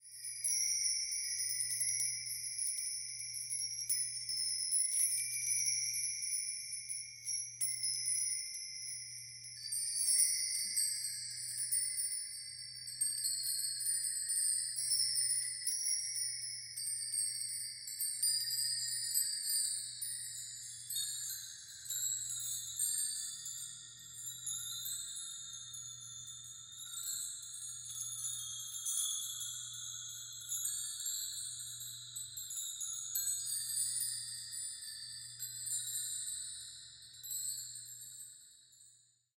Chimes flange
a marktree with slight flanging effects
chimes, flange, mark, metal, tree